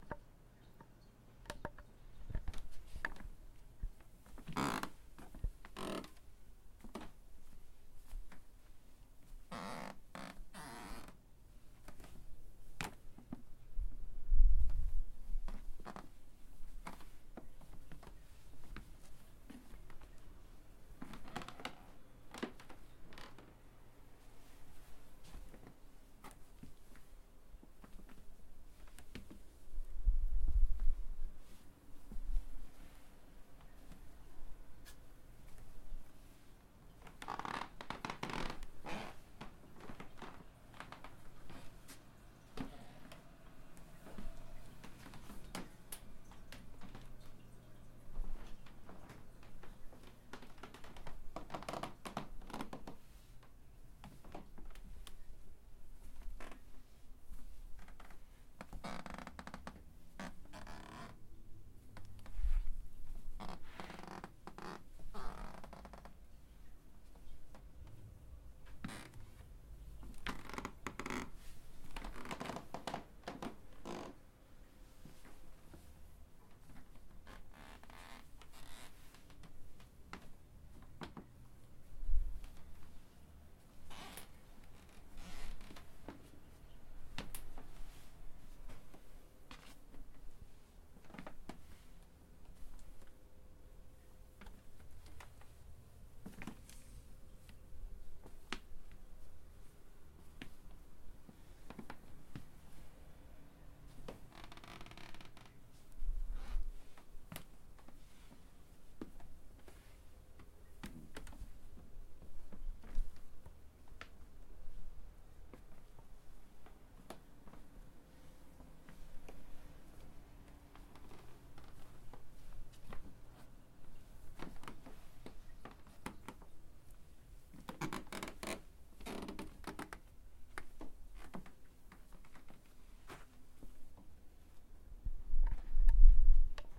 Creaking floor1
Walking on a wooden creaky floor, recorded with H4N
creaking, creepy, feet, footsteps, steps, walk, wood